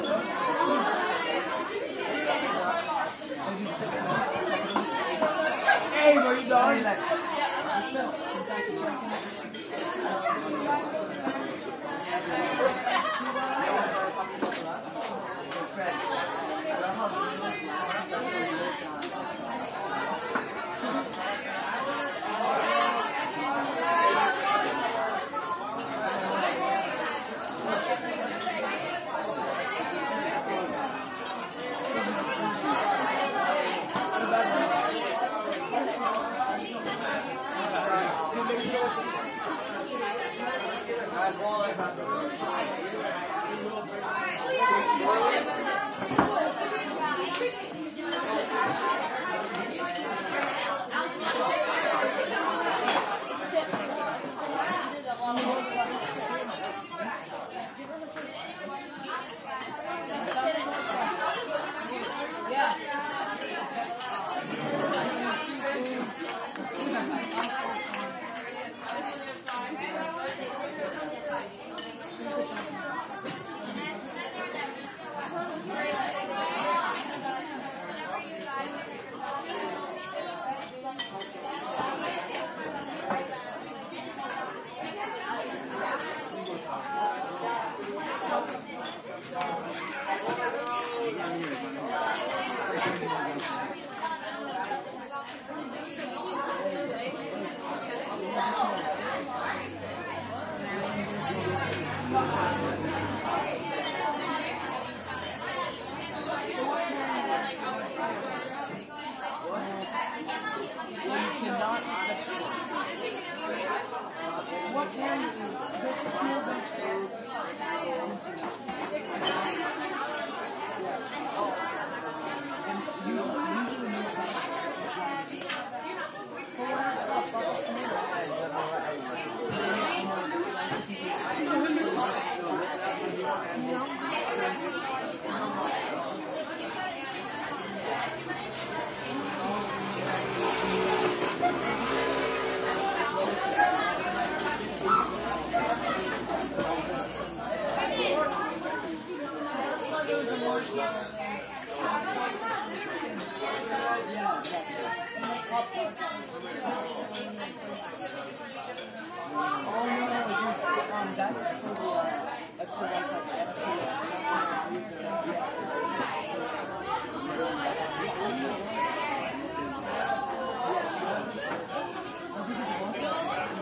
Thailand crowd int medium active young people Belgian tourists eating at roadside restaurant heavy walla, cutlery, and steps movement wider perspective, field-recording
restaurant, field-recording, movement, steps, walla, crowd, young, Thailand, int, active, medium